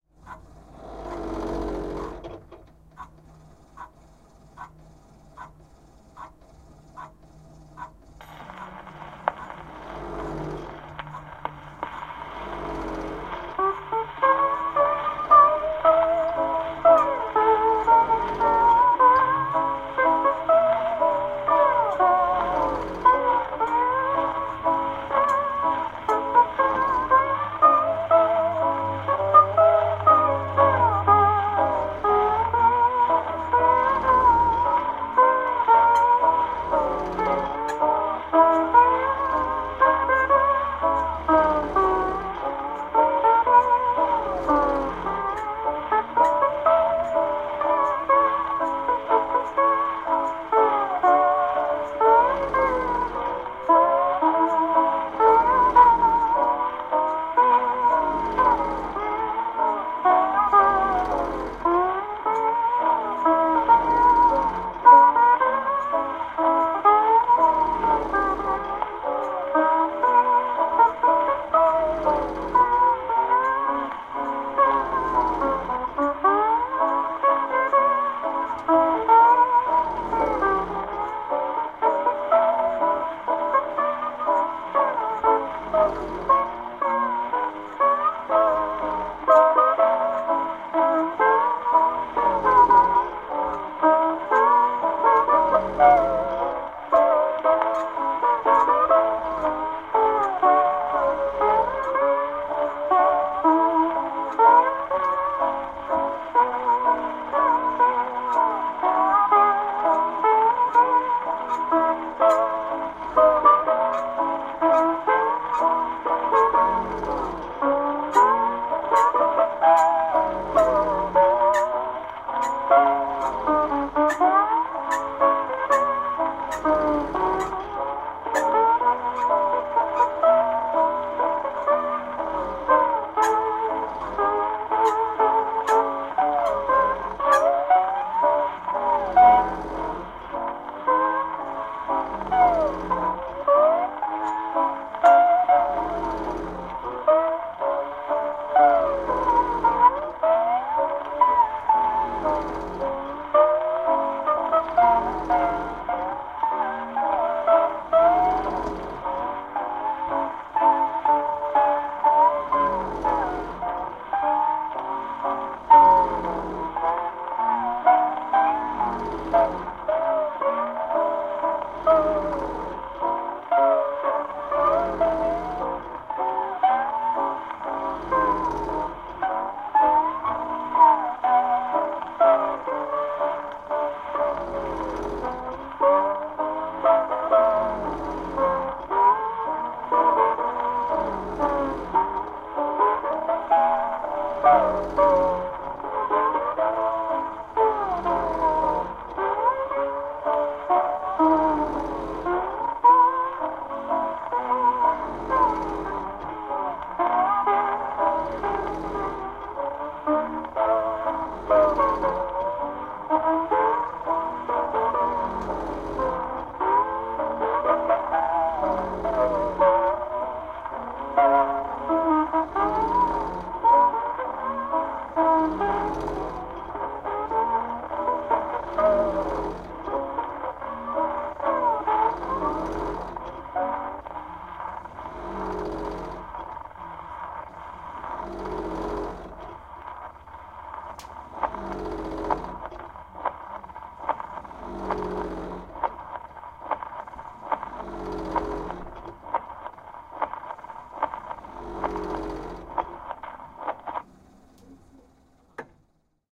VICTROLA VV XI--Victor Talking Machine Phonograph
This is a recording of a malfunctioning Victor Victrola VV XI Talking Machine Phonograph playing an old, old recording of Let the Rest of The World Go By. I loved the grinding sound of the transport and how it drags the song down every few seconds. Eerie and comforting at the same time.
Victor, Victrola, Machine, Record, Talking, Phonograph